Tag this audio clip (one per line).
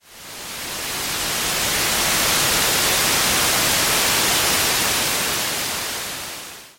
earth
sandy
sand
construction